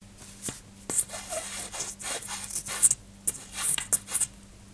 Adding chalk to a snooker cue
A sound effect of a chalking a snooker cue
cue, pool, snooker, chalk